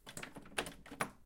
Door Locked 03
Office door. Recorded with Zoom H4n.
Door, field-recording, handle, locked